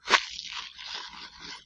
Monster eating flesh.